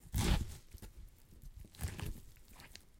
Saw with gore
Some gruesome squelches, heavy impacts and random bits of foley that have been lying around.
foley, gore, blood, splat, vegtables, violent